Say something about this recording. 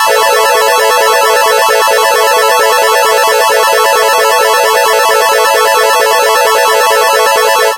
slot machine
j, tracker